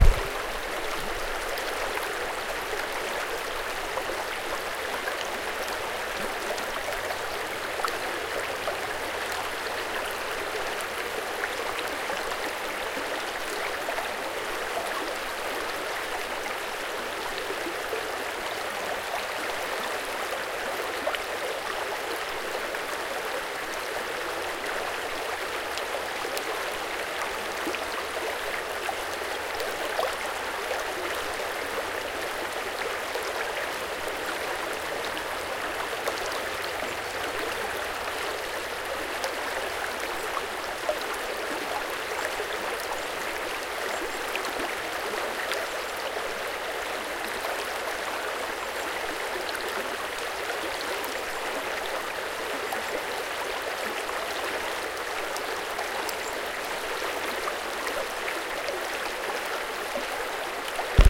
Snow-melting makes a flood on a mountain stream. Winter forest environment in background.